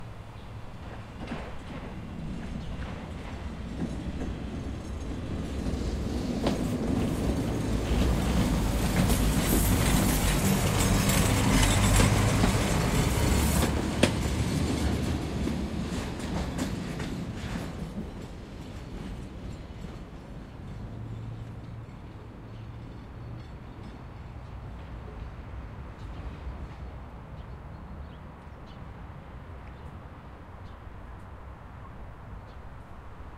tram at veering 2
Streetcar at veering.
Recorded 2012-10-13.
city, noise, rumble, Russia, streetcar, tram, turn, veering